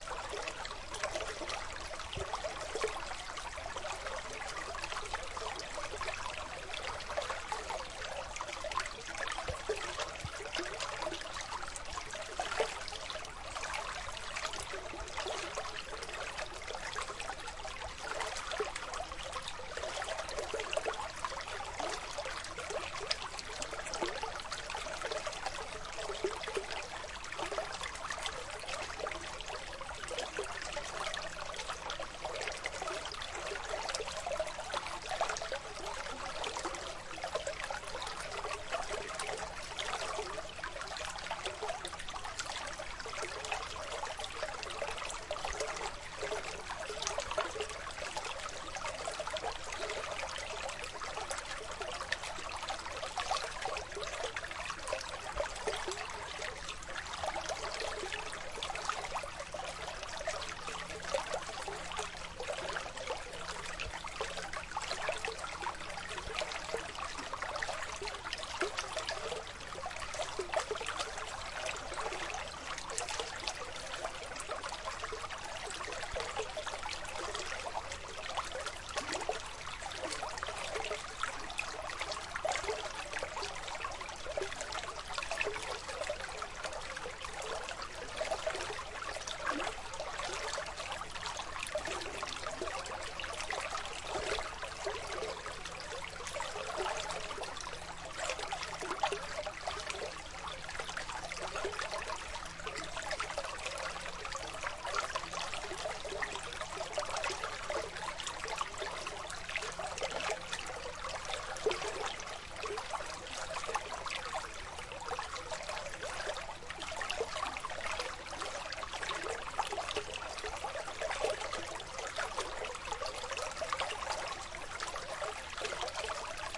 brook gurgling
Brook (small stream) flowing noisily, recorded on Zoom H2 in the south of sweden.